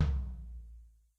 Rick DRUM TOM LO soft
Tom lo soft
acoustic, drum, rick, stereo